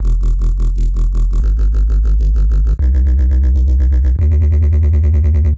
Dirty Bassloop created with Thor.